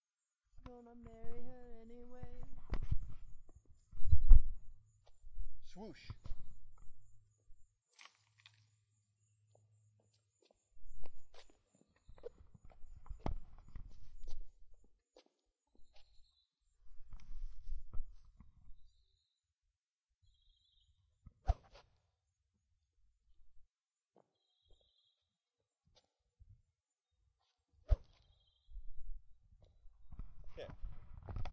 Golf Swing Swoosh
*NOTE* The usable sound is at the end of the clip.
An attempt at recording the swoosh of a golf club. There is a lot of background noise, including the sounds of crickets and birds, and the sound of stepping in grass. Recorded on a DR07 mkII in Southwest Florida. Some wind noise with crickets in the background.
If you can, please share the project you used this in.
ambiance, ambience, ambient, atmosphere, bird, birds, club, cricket, crickets, field-recording, golf, grass, insects, nature, night, step, summer, swing, swoosh